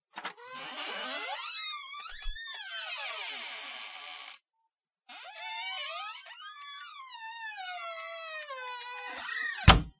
An office door in dire need of some oil. Opening and closing.